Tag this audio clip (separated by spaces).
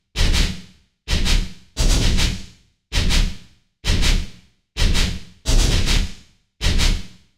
dance dub-step effect electro freaky fx house loop minimal rave techno